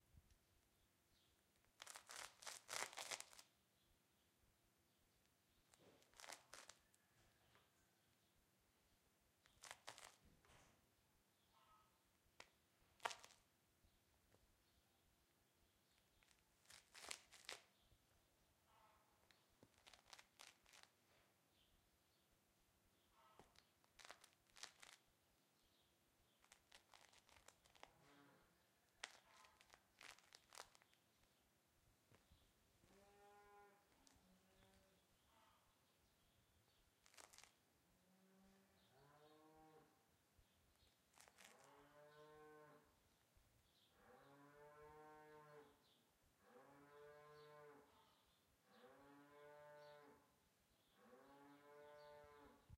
Sound of dust and litle rocks under the shoe while stomping on rough concrete. Some cows moing on the end.
Recorded with sound recorder Zoom h1.
walking, concrete, cows, fire, cracking, noise, crack, dust, small, rocks, mu